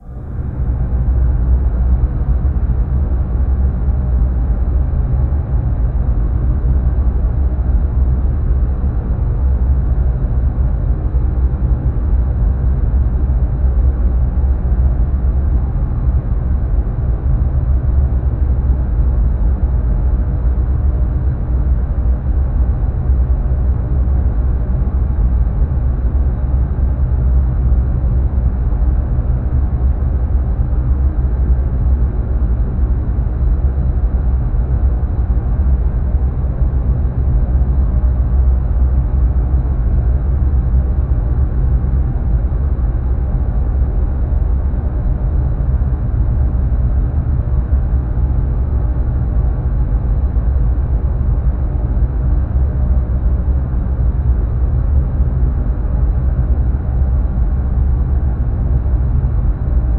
Low frequency machine drone
bass, drone, machine